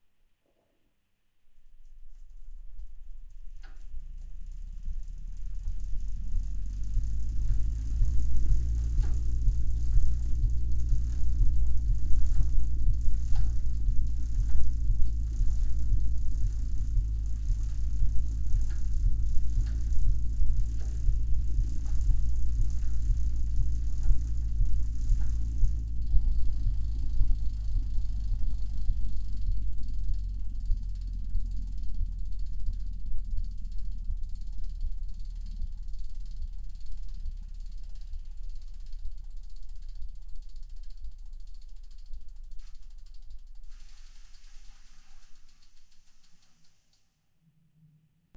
Road Bike, Wheel, Spin, LFE, Lo Speed
The sound of a road bike wheel being cranked at low speed whilst clasped off the ground
Vehicle, Transport